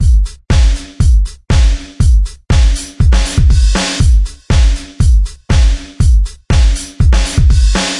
Electronic rock - Red-ox P4 Rhythm drum 02.Mixed, compressed & limited.
beat, drum